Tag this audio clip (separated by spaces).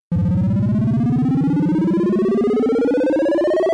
bloop bleep fast high high-score